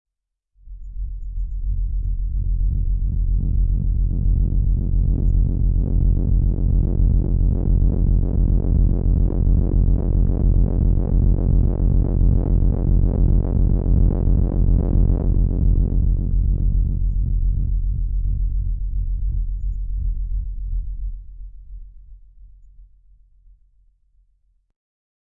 ANALOG DRONE 4
A drone sound i created using a DSI Mopho, recorded in Logic.